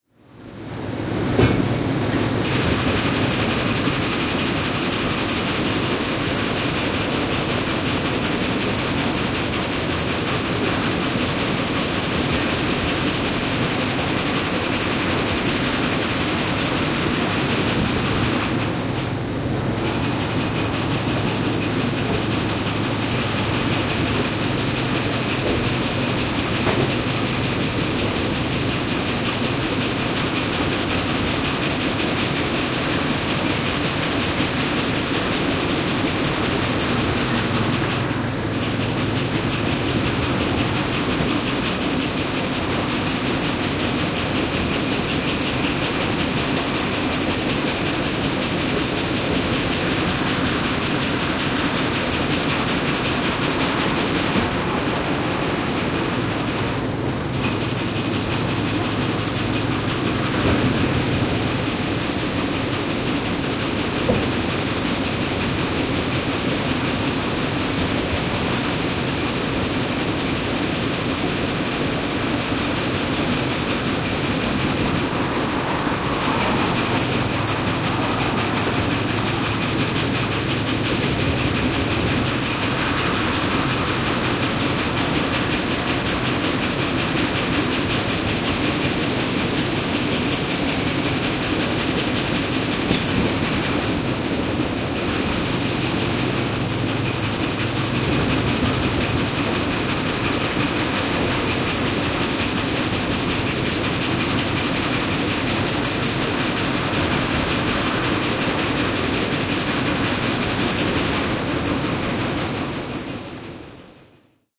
Saha, sahalaitos, raamisaha / Saw mill, 1970s. Frame saws at work, noises of the saw mill in the bg
Matkun saha, 1970-luku. Kaksi raamisahaa työssä. Taustalla sahalaitoksen hälyä.
Paikka/Place: Suomi / Finland / Forssa, Matku
Aika/Date: 04.04.1974
Field-Recording, Finland, Finnish-Broadcasting-Company, Frame-saw, Koneet, Machines, Mill, Puu, Puuteollisuus, Raamisaha, Saha, Sahalaitos, Saw-mill, Soundfx, Suomi, Tehosteet, Wood, Woodworking-industry, Yle, Yleisradio